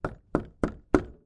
slow knock 01
door, hit, impact, knock, slow, wood, wooden